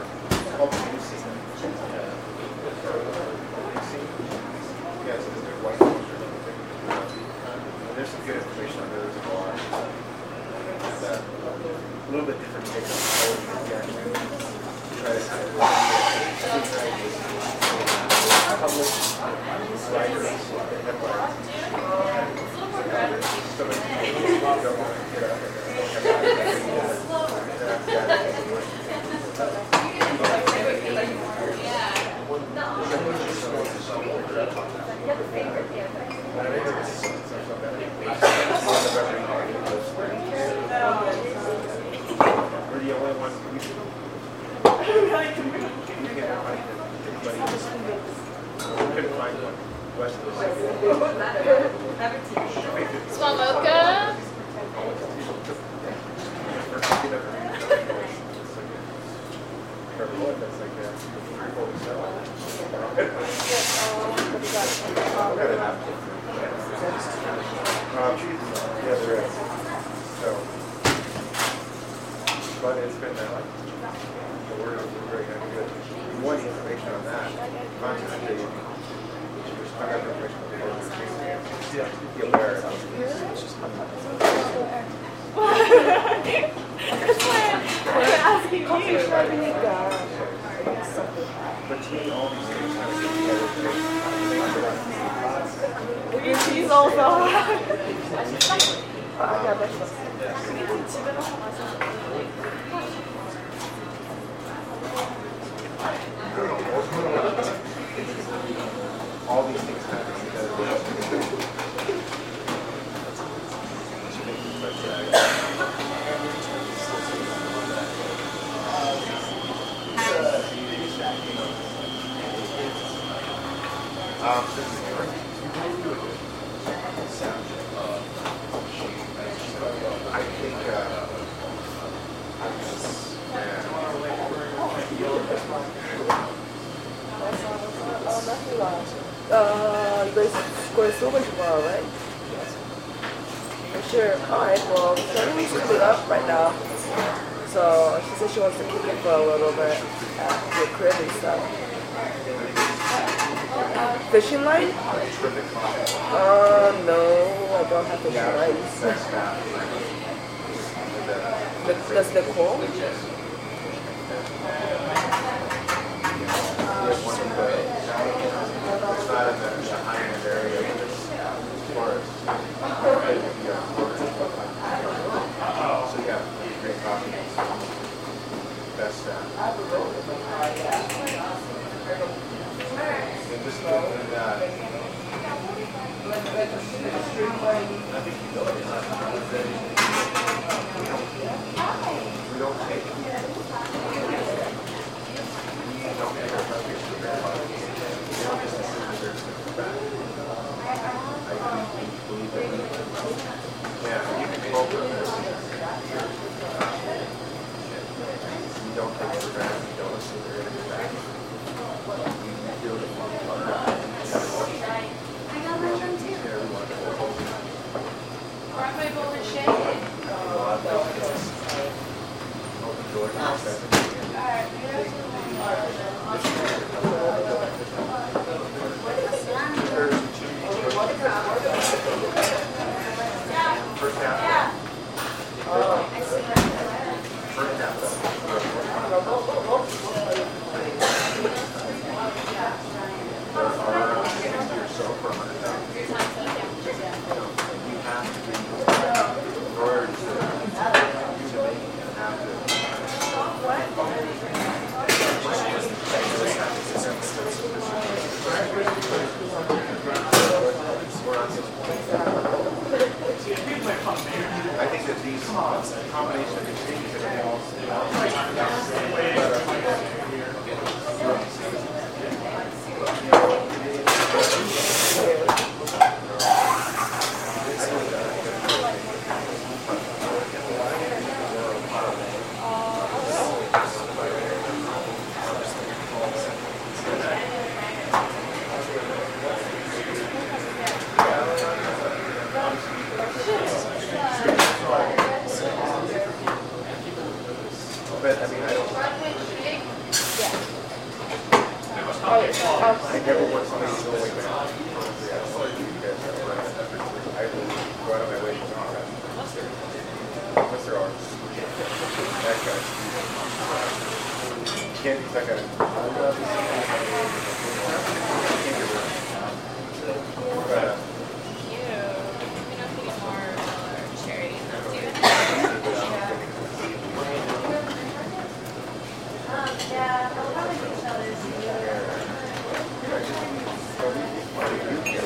general ambience from bar
This is a recording of general ambience at the Folsom St. Coffee Co. in Boulder, Colorado. It includes the room tone, patrons conversing to each other, and the sounds of university students doing their homework.
ambience, coffee, shop, talking, wild